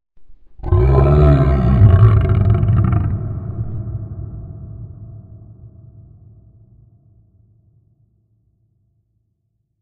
Deep sea monster
Going for a Deep Sea type groan.